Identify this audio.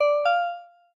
A little notification sound. Made with AudioSauna.
Game, Effect, Synth, Notify